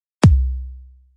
synthesized in NI massive
kick, synth, drum, massive, bass